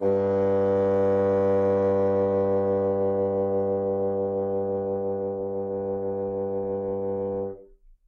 One-shot from Versilian Studios Chamber Orchestra 2: Community Edition sampling project.
Instrument family: Woodwinds
Instrument: Bassoon
Articulation: vibrato sustain
Note: F#2
Midi note: 43
Midi velocity (center): 95
Microphone: 2x Rode NT1-A
Performer: P. Sauter